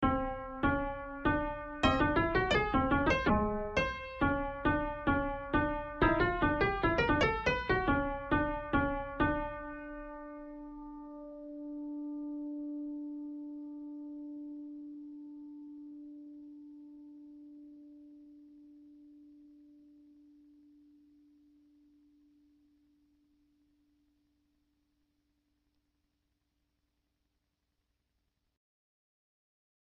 a beat sound